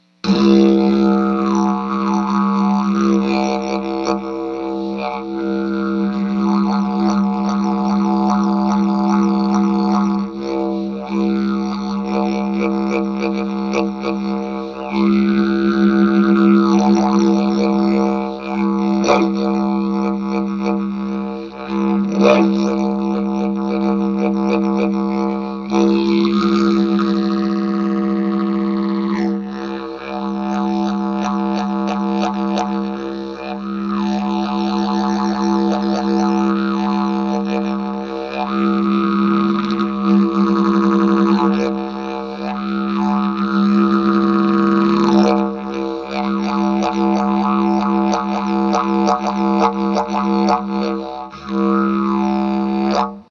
This is me on my didgeridoo.